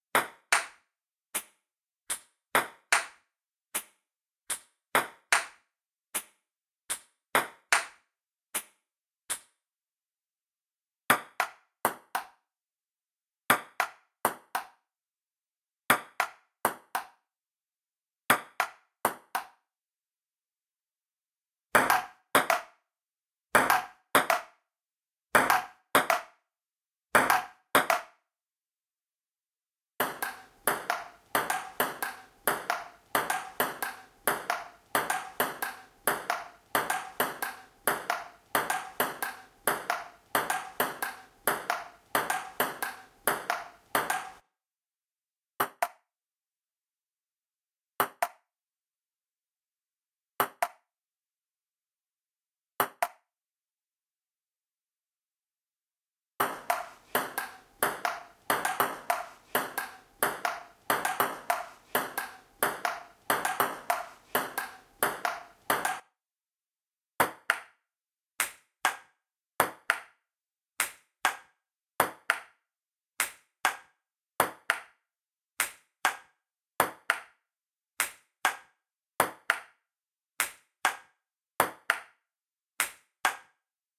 recordings of ping pong. a bit processed so you don`t have to look for "clean" areas.